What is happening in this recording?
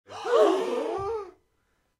breath group shocked6
a group of people breathing in rapidly, shock-reaction
shocked, breath, air, shock, suspense, tension